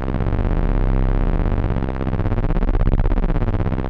Something like PWM, but not really.